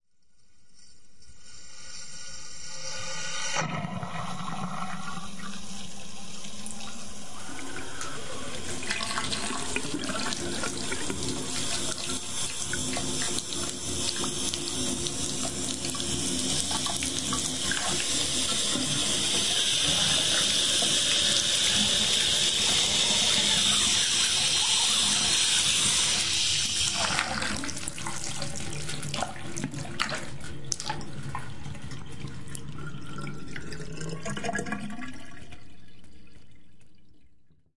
Bath faucet and drain
Miked at 3-4" distance.
Mic suspended near mouth of faucet spout.